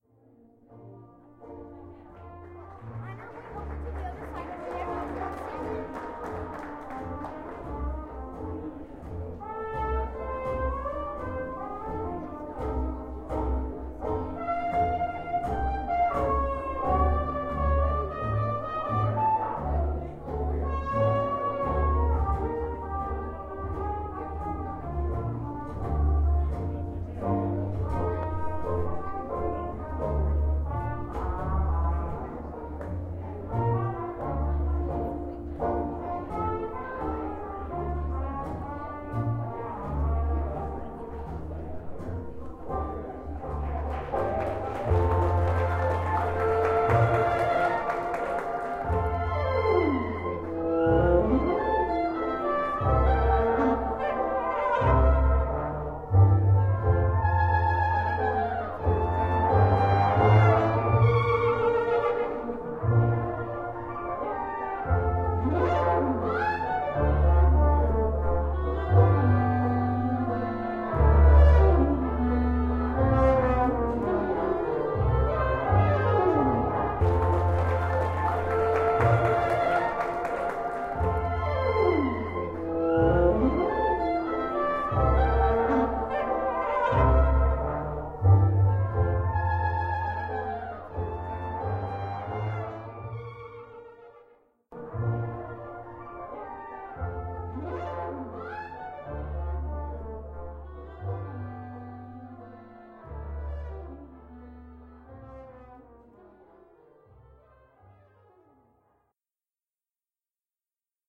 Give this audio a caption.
Recorded in the New Orleans French Quarter during early August 2017.
jazz, New, Orleans, street